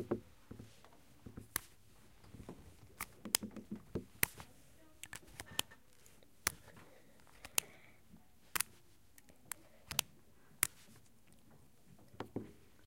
Ecole Olivier Métra, Paris. Field recordings made within the school grounds. A pen lid is taken on and off.